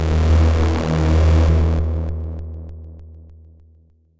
Sine wave created and processed with Sampled freeware and then mastered in CoolEdit96. Mono sample stage one.
free, hackey, hacky, larry, sac, sack, sample, sine, sound, synthesis